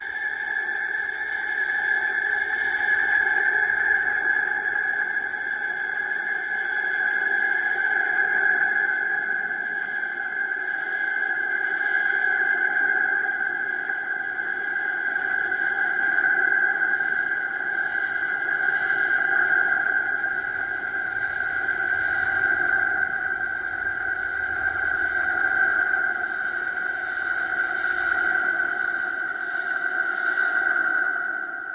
ps au Just about to be hit by insanity
Made in Paulstretch and then edited in Audacity (mainly echoes, tempo acceleration and pitch lowering).
accelerating, echoes, eerie, noise, Paulstretch, pulsating